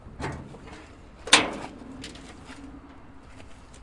Clossing bonnet of the old russian car Moskvich-412.
Recorded: 2012-10-25.
412, bonnet, car, city, Moskvich, USSR, vehicle